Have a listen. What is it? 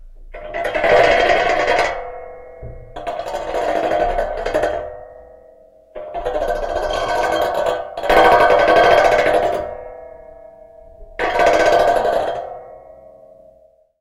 radiator run
Running a car key along a large radiator. Recorded with a contact mic taped to the radiator going into a camcorder.
clang, contact, hit, metallic, percussion, radiator, ring